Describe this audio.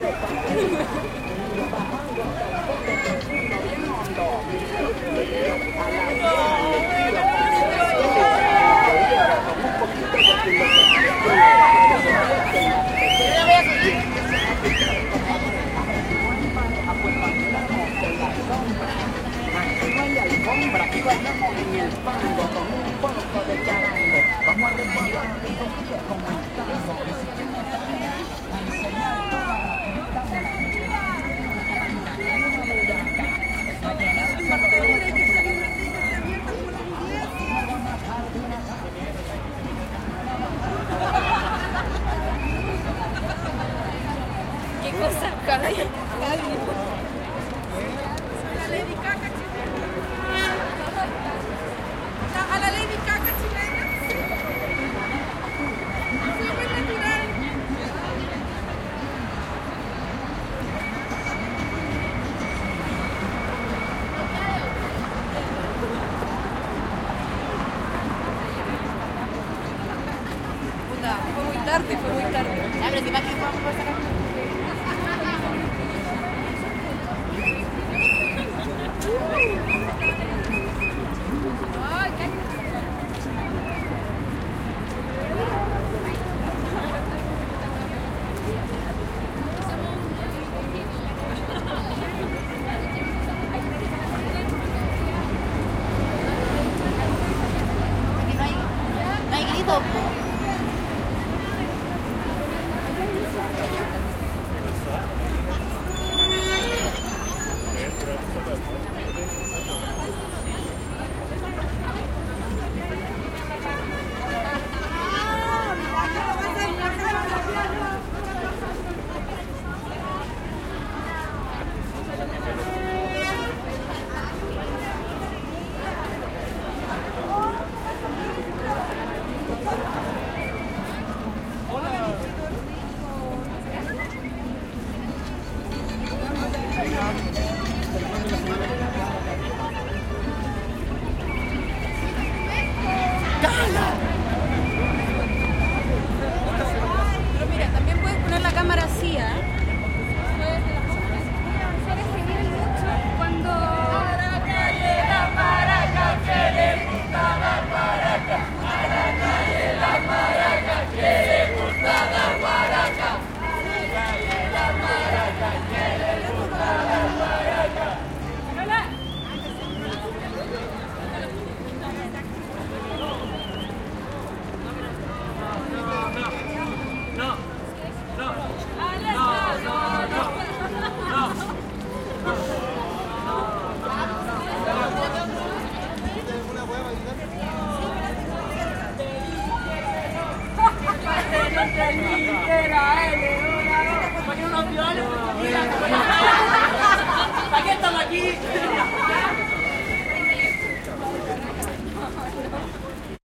marcha de las putas y maracas 02 - comienza la marcha
Conversaciones en un fondo de Calle 13 hasta que se escuchan los primeros gritos.
gritos, leonor, crowd, silvestri, protest, putas, santiago, calle, chile, marcha, street, protesta, maracas